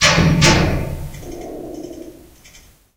Creepy Metal Door Bang
Mix of slowed down (reduced rate) heater sounds to create that monster banging on the door feel. Mixed in Audacity.
clang, fence, hit, horror, impact, iron, metal, strike, ting